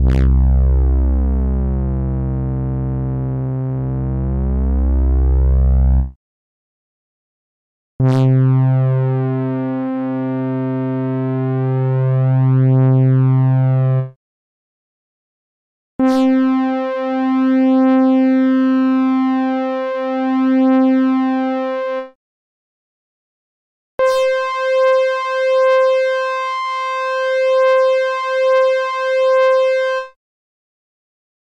Preset sound from the Evolution EVS-1 synthesizer, a peculiar and rather unique instrument which employed both FM and subtractive synthesis. This analogue polysynth sound is a multisample at different octaves.